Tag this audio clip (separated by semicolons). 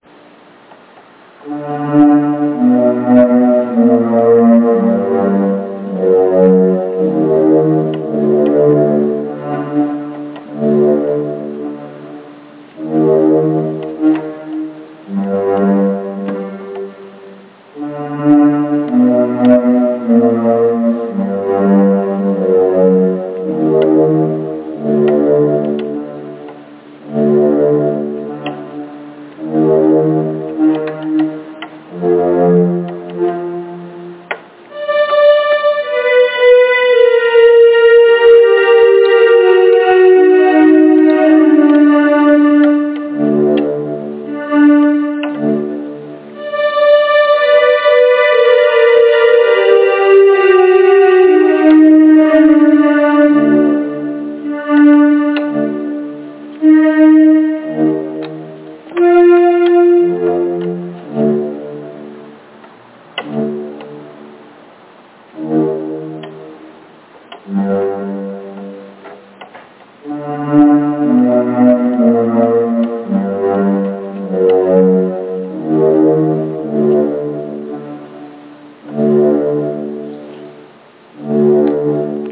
Creepy; Dramatic; Scale; Slow; Sound; Strings